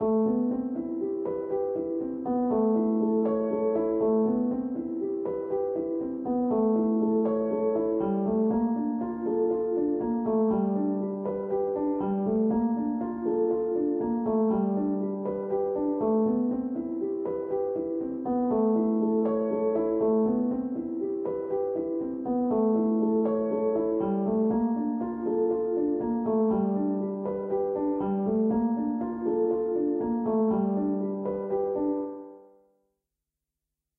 Piano loops 052 octave down short loop 120 bpm
120bpm, reverb, music, samples, loop, simplesamples, free, Piano, 120, simple, bpm